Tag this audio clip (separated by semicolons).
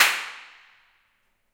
bang; clap; convolution-reverb; impulse-response; reflections; reverb; room; spaces